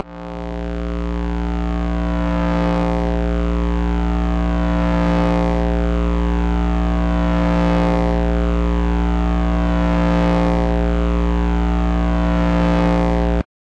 LONG LIGHTSABRE BUZZ 1
Another thing for a star wars spoof project, lightsabre noise made with the ES2 synth and a bunch of messing around.
Star-wars, Sound-Effect, Synthesized, Lightsabre